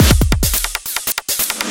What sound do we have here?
140bpm Jovica's Witness 1 7
electro, experimental, 140bpm, weird, jovica